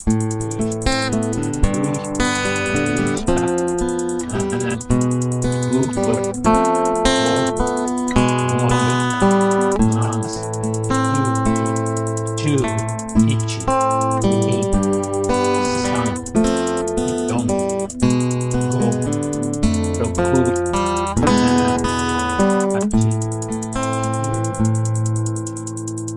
Aminor (Am G F E - 110bpm)
Spanish style guitar in A minor. Am G F E chords.
Fingerpicking and sampling.
For your loopable pleasure